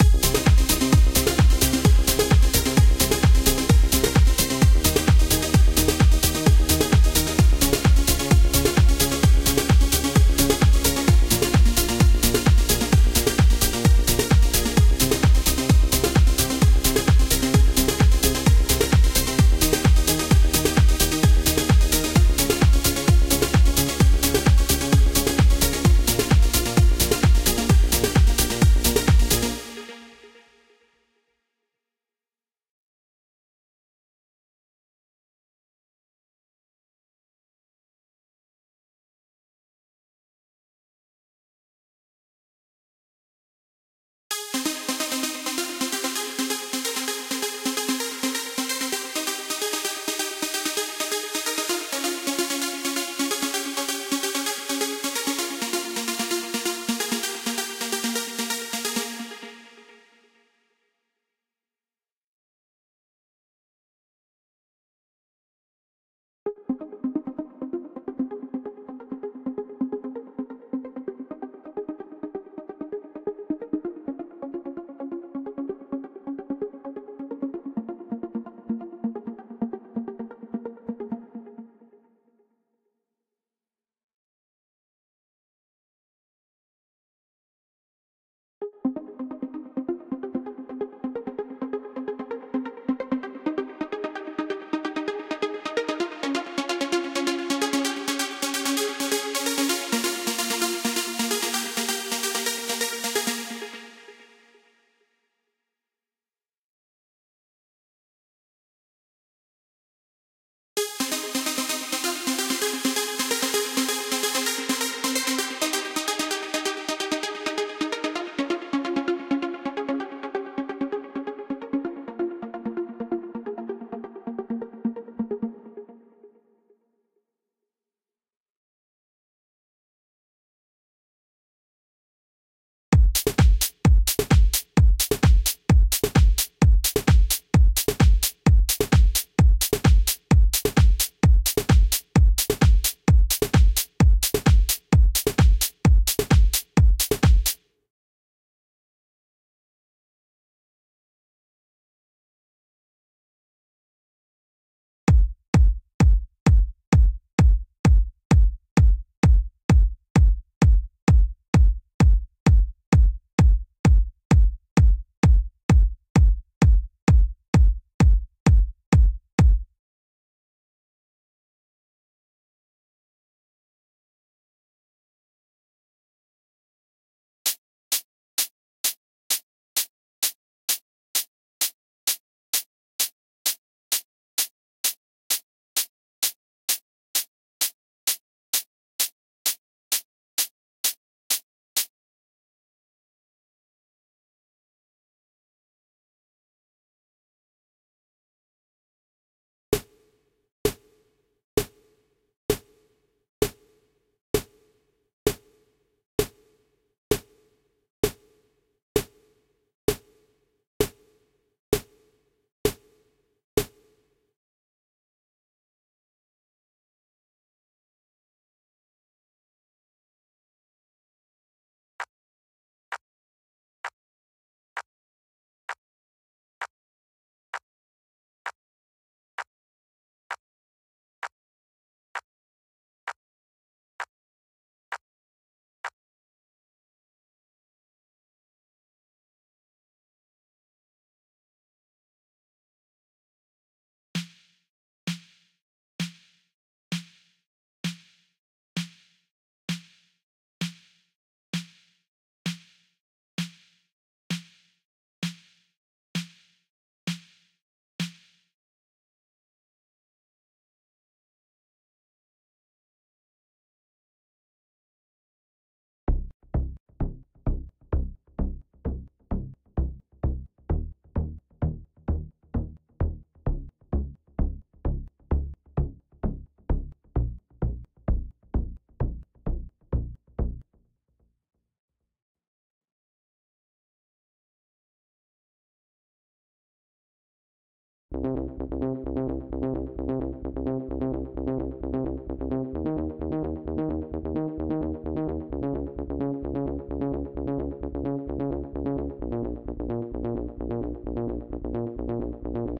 THE LEAD
This is just a loop I made in FL studio, broken down into its core elements.
All of the sounds were made in Sylenth 1.
Produced by - R3K4CE
130, electronic, hat, sylenth, drums, minor, construction, mix